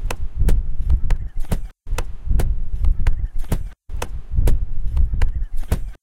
Tracks> Add new> stereo tracks
Paste interesting sound
Effect> progressive variation of the height >
Initial change tempo 131%
Final change: 75%
Effect> Normalize> Maximum Amplitude: 1.0dB
Effect> Change the speed> 50 Percentage change